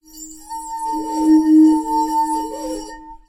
Tono Corto Agudo-02
bohemia glass glasses wine flute violin jangle tinkle clank cling clang clink chink ring
clink, glass, ring, bohemia, tinkle, violin, jangle, chink, flute, wine, cling, glasses, clang, clank